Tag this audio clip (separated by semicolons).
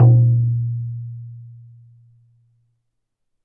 bodhran; drum; drums; frame; hand; percs; percussion; percussive; shaman; shamanic; sticks